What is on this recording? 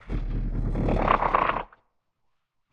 One of many quick scarecrow noises, but you use this for anything really. Original recording was made on an AKG C414 using the Earthworks 1024
There are more than 20 of these, so I will upload at a later date